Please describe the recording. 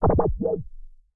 Something hits a energy shield
arcade, computer, damage, games, laboratory, laser, protection, robot, shield, space-war, video-games